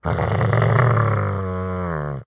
Animal Dog Growl 01
Animal Dog Growl